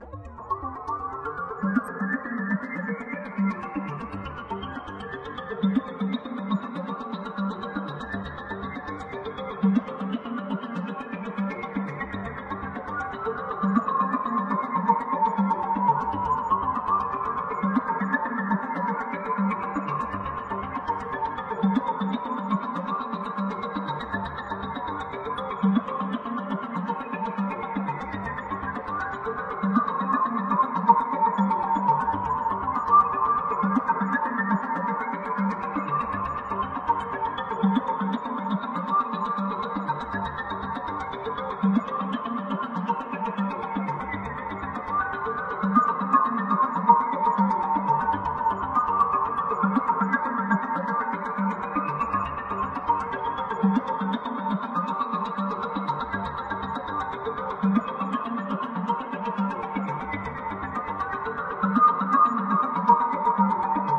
ARP A - var 2
ARPS A - I took a self created Juno (I own an Alpha-Juno 2) sound, made a little arpeggio-like sound for it, and mangled the sound through some severe effects (Camel Space, Camel Phat, Metallurgy, some effects from Quantum FX) resulting in 8 different flavours (1 till 8), all with quite some feedback in them. 8 bar loop at 4/4 120 BPM. Enjoy!
120bpm, arpeggio, feedback, juno, melodic, sequence